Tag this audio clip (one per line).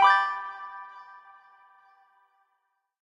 soft-click
design
hud
sfx
game
soft
startup
success
interface
clicks
bootup
gui
achievement
beep